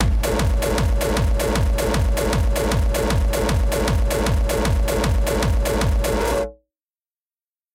xKicks - Metal Metal
There are plenty of new xKicks still sitting on my computer here… and i mean tens of thousands of now-HQ distorted kicks just waiting to be released for free.
hard, hardstyle, kick, bassdrum, distortion, kickdrum, bass, techno, hardcore, distorted